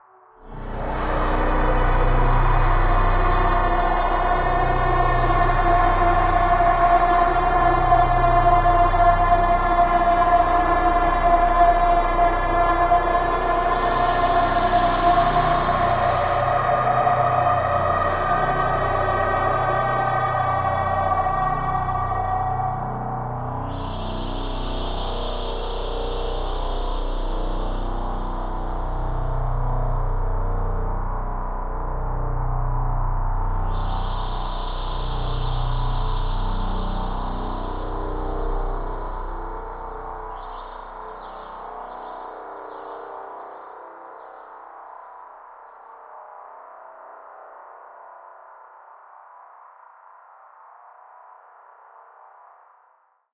LAYERS 007 - Overtone Forest - B1
LAYERS 007 - Overtone Forest is an extensive multisample package containing 97 samples covering C0 till C8. The key name is included in the sample name. The sound of Overtone Forest is already in the name: an ambient drone pad with some interesting overtones and harmonies that can be played as a PAD sound in your favourite sampler. It was created using NI Kontakt 3 as well as some soft synths (Karma Synth, Discovey Pro, D'cota) within Cubase and a lot of convolution (Voxengo's Pristine Space is my favourite).
artificial, soundscape, pad, multisample, drone